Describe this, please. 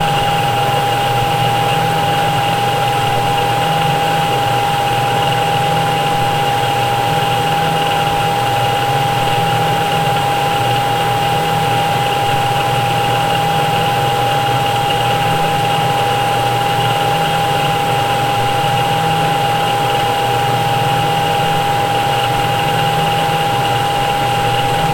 pool pump loop
A pool pump that maintains the chlorine level of a pool.
ambience, pool, drone, field-recording, ambient, pump